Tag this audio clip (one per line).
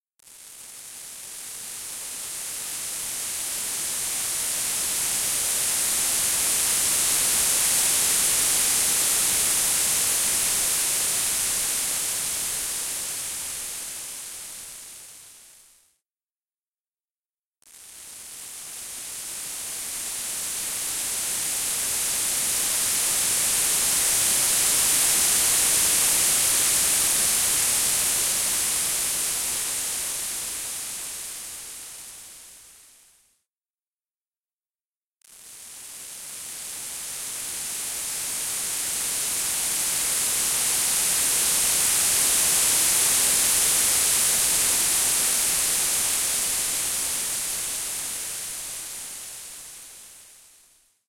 air
blowing